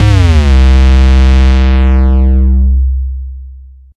Jungle Bass Hit C1
Jungle Bass [Instrument]
Bass, Instrument, Jungle